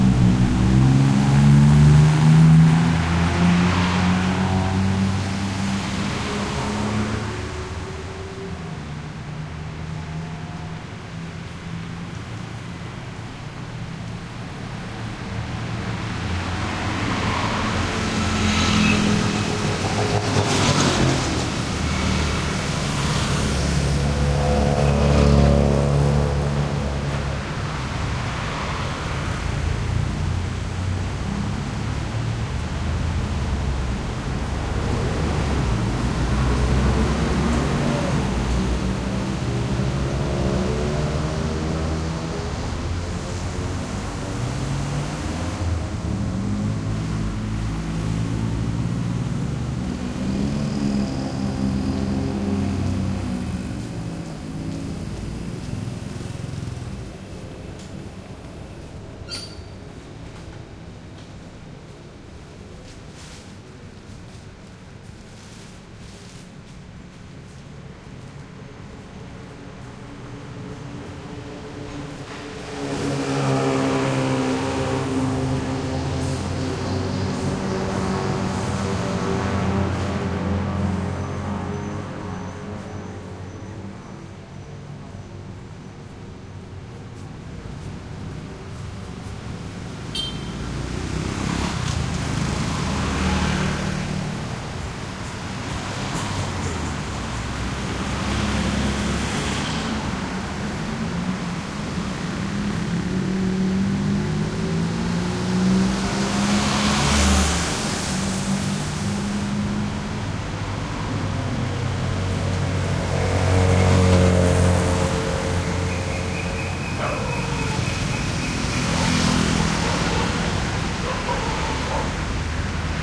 Thailand Bangkok traffic med morning motorcycles from 2nd floor window tight street echo1
Thailand Bangkok traffic med morning motorcycles from 2nd floor window tight street echo
Bangkok, field-recording, morning, motorcycles, Thailand, traffic